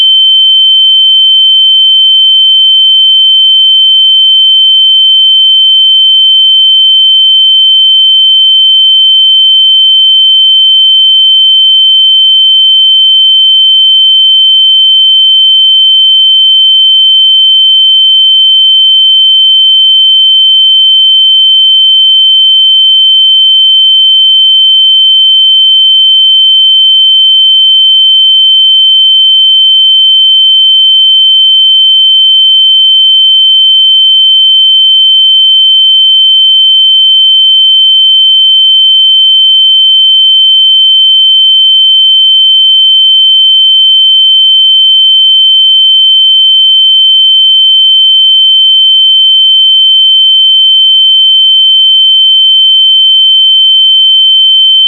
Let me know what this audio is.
Ear Ringing Sound
The sound you can hear after explosion.